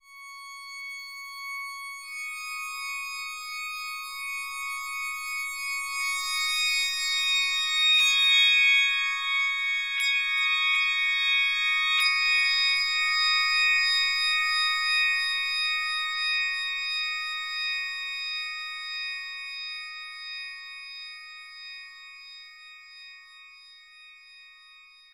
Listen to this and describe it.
FX Athenas Waveform
A synthesized waveform, used as a weapon by a supervillain on Mission: Rejected.